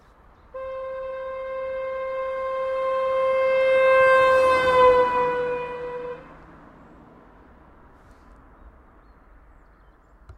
Horn Doppler 01
A recording of a passing car and its horn.
Recorded with a "zoom - H4n"